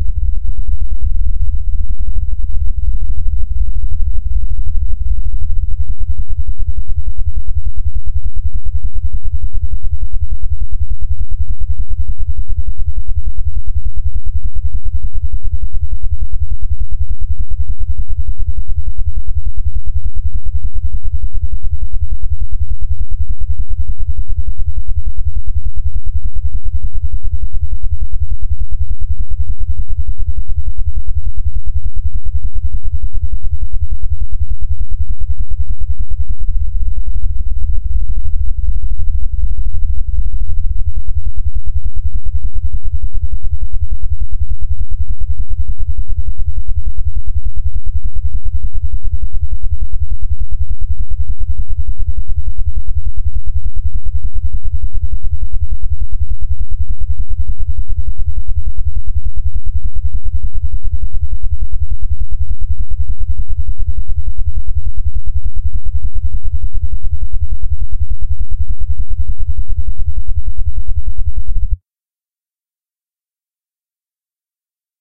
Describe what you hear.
This sound was created by taking a file of solar irradiance data, and importing the data as a raw sound file using Audacity software. This is my first attempt to create sound from data; I hope to get better results later, but these may interest someone.